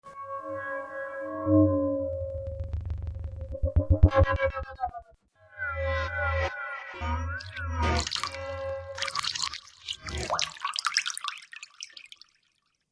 dish hitting underwater + post production
sfx, hydrophone, water, sounddesign